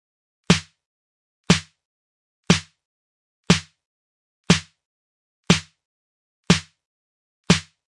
fierce lo-fi snare
a lo-fi snare sounding slightly different on each hit
lo-fi, loop, snare